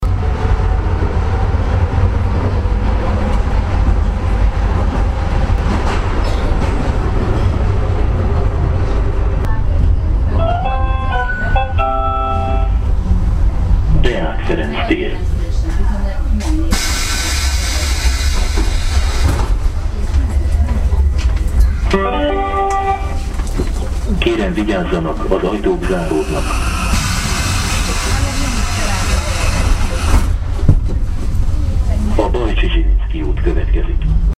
the first Underground (Capital of Hungary) 2
This record sounds are the first underground of Europe. (Hungary, Budapest)